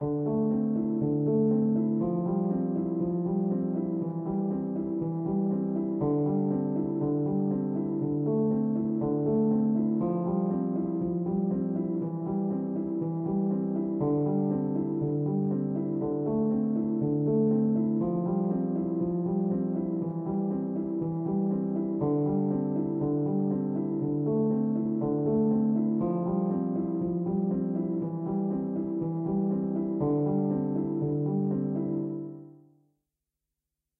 Piano loops 072 octave down short loop 120 bpm
reverb, 120bpm, music, Piano, samples, simplesamples, 120, free, loop, simple, bpm, pianomusic